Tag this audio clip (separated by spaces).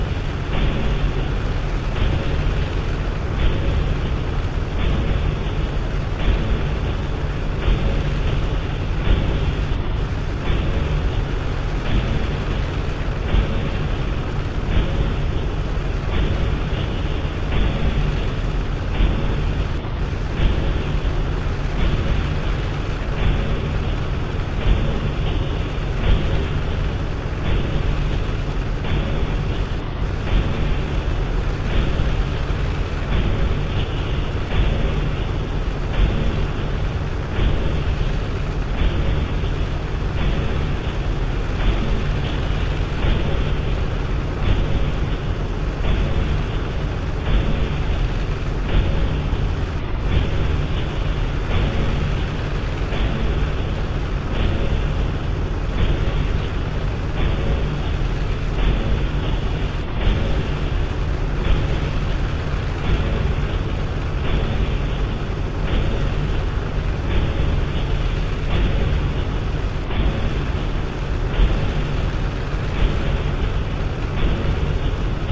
drone freaky loop space